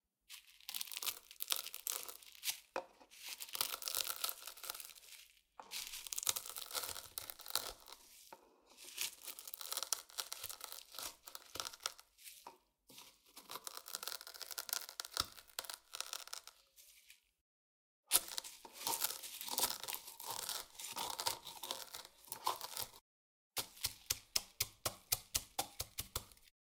FOODCook Grinding Spices In A Mortar And Pestle 01 JOSH OWI 3RD YEAR SFX PACK Scarlett 18i20, Samson C01
Grinding Bay leaves pepper salt and coriander seeds in a mortar and pestle with a few variations in speed and strength